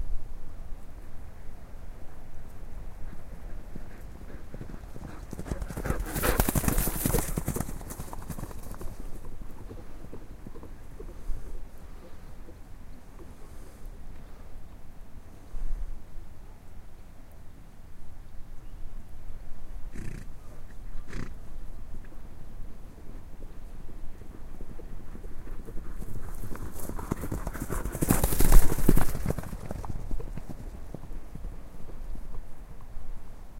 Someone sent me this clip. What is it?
Gallopping ponies / horses. From right to left and vice versa.